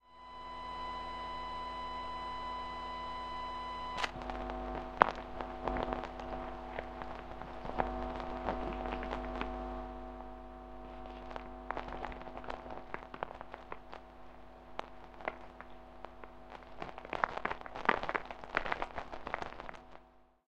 Ground loop with intermittent clicks. Lightly processed.